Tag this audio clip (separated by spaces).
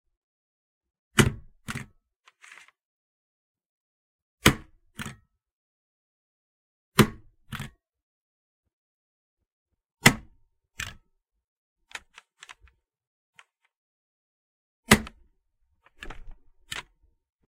bed bedroom clock close door drawer house household lamp noise ring slam tick window